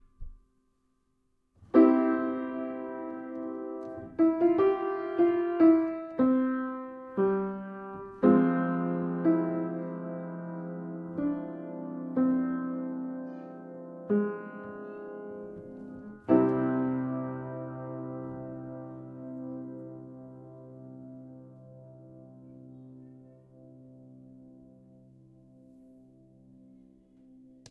Piano pling
someone who tries to play some tunes. Recorded with ZoomH6.
film, intro, music, outro, piano, short, tune